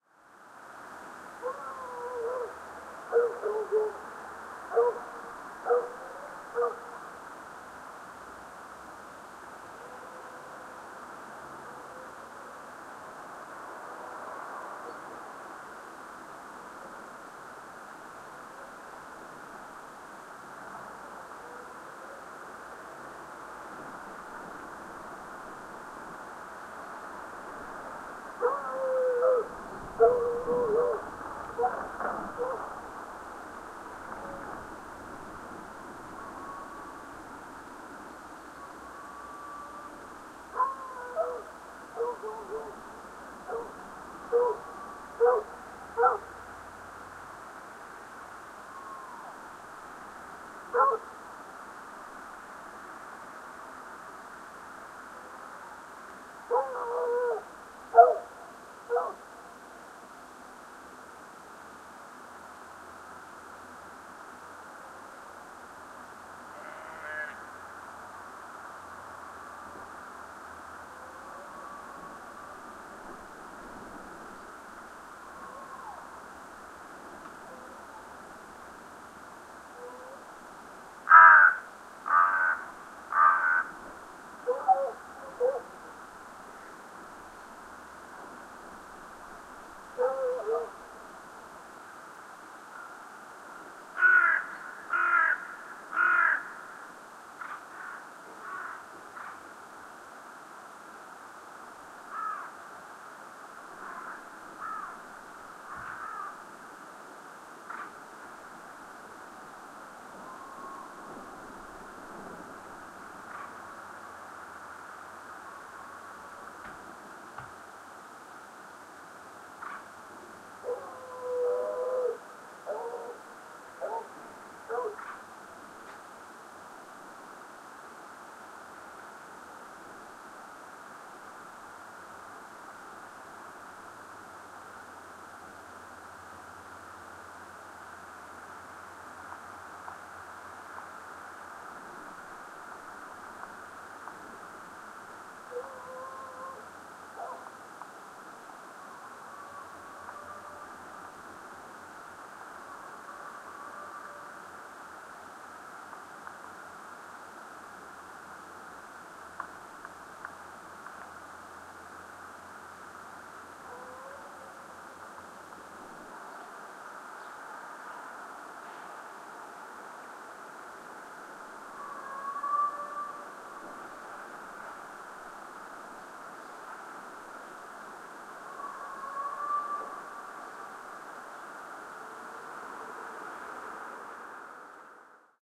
Distant Foxhunt
A stereo field-recording of a foxhunt conducted on foot in the distant hills on a windy day.The hound that you hear was separated from the pack. Rode NT-4 > FEL battery pre-amp > Zoom H2 line in.
barking, dog, dogs, field-recording, fox, foxhunt, hound, hounds, hunt, hunting, pack, xy